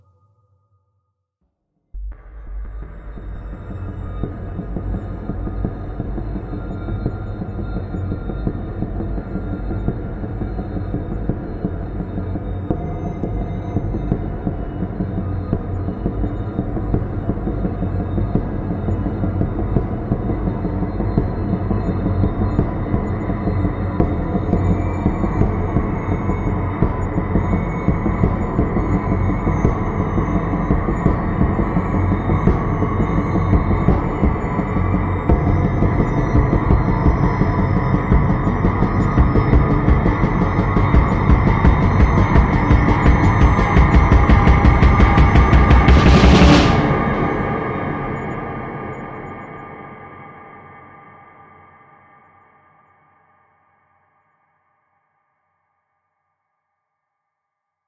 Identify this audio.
Nightmare Approaching sound
Approaching Army? Rising nightmare?
Wrote/Recorded an eerie nightmare approaching in the distance sound.
The sound starts soft and builds to a crescendo.
EZDrummer2 was used w/ a low pass EQ filter.
Panned Left is Ableton stock plugin Glass Cave Mirror.
Panned Right is Polar Pad.
Center is the EZDrummer2 w/ a low pass EQ filter combined with the All Alone Pad stock plugin.
Each track is sent to a Return track w/ heavy reverb and adjusted for parallel processing.
Additional notes:
-13.4 LUFS integrated
-2.1 dB True Peak Max.
Want something more customized?
hope this helps and is useful for your next project.
cheers,
sinister, dread, doom, rising, scary, haunted, dramatic, imminent, spectre, spooky, terror, suspense, horror, fear, eerie, ghost, delusion, frightful, phantasm, thrill, drama, phantom, fearful, film, nightmare, creepy, army, battle, terrifying, distant